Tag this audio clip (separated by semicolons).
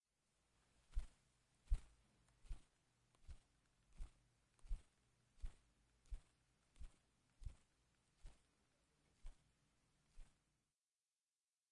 aleteo; mariposa; volar